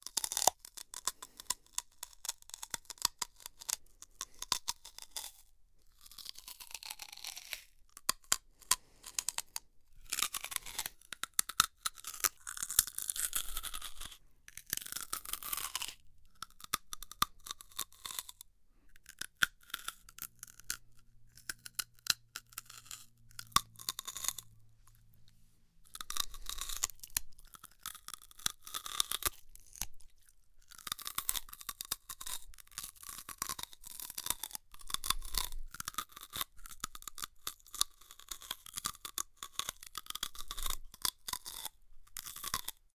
Chomping on celery. Most of the breathing and chewing have been edited out. Enjoy!